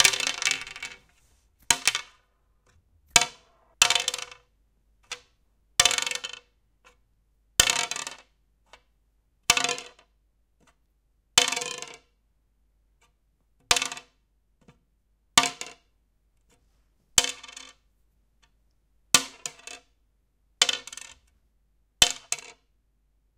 Dice on Plastic
Different dice (including w20 and w6) on top of a plastic surface.
Recorded with Zoom H2. Edited with Audacity.
casino, dice, gamble, gambling, game, gamification, plaything, toy